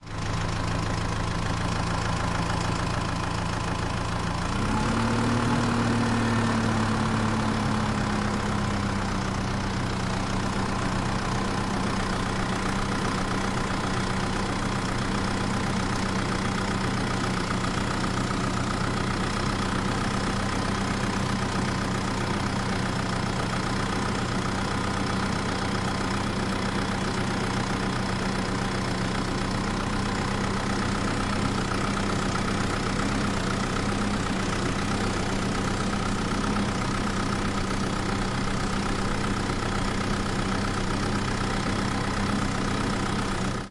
Tractor Engine
This is a Fendt tractor with engine turned on, then driving at different speeds from an inside perspective. Unprocessed.
agricultural, agriculture, tractor, motor, farming, engine, farmland, fiel-recording, fendt, field